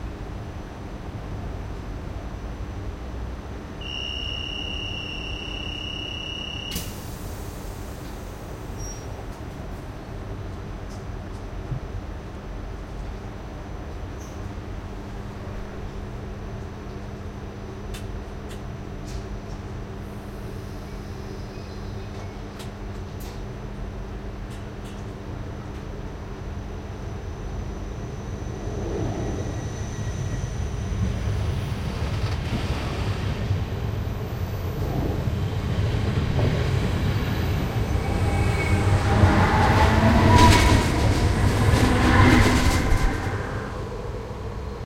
Trainstation starting train
Field recording of an electric train starting at a Dutch trainstation.
closing, doors, Locomotive, Platform, Railway, start, starting, Station, Train